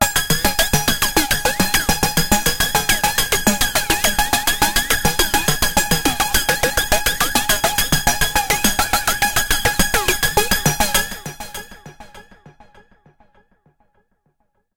130 BPM arpeggiated loop - G#5 - variation 6
This is a 130 BPM 6 bar at 4/4 loop from my Q Rack hardware synth. It is part of the "Q multi 005: 130 BPM arpeggiated loop" sample pack. The sound is on the key in the name of the file. I created several variations (1 till 6, to be found in the filename) with various settings for filter type, cutoff and resonance and I played also with the filter & amplitude envelopes.